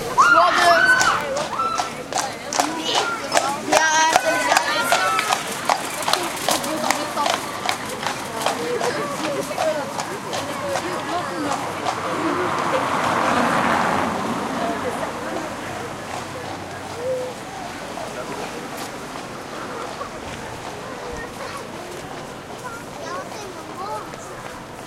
20100402.Brugge.street.02
street ambiance in Brugge (Bruges, Brujas), with horse cart passing close and voices. Olympus LS10 internal mics
bruges, travel, ambiance, horse, carriage, field-recording, street